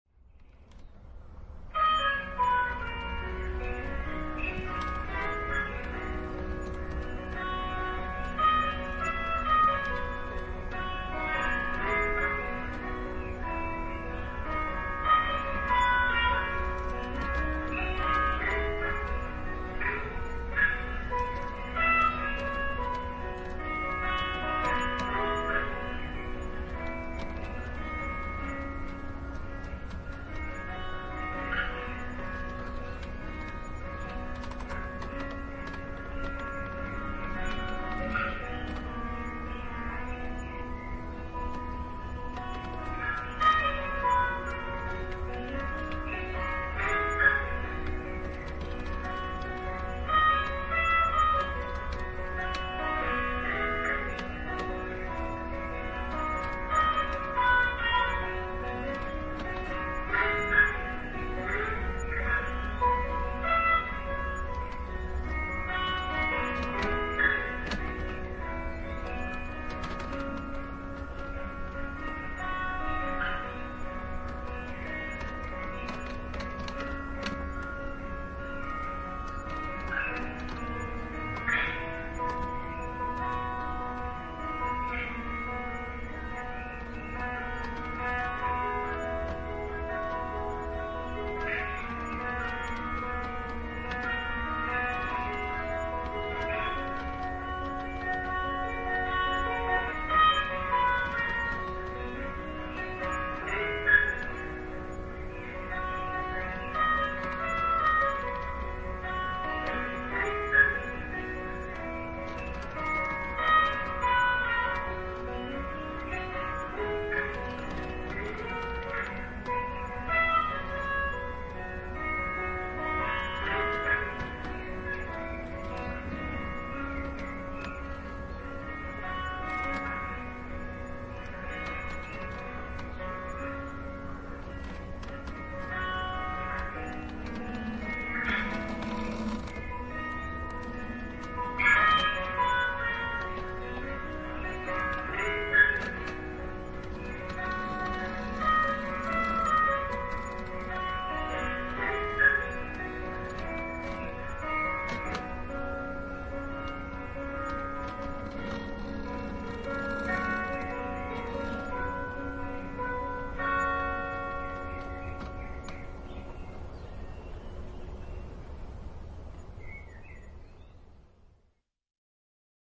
house or room ambiance with radio music